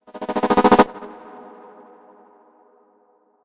140 BPM FX Dubstep Chopper Twist
Genre: Dubstep
Type: FX
Made with NI Massive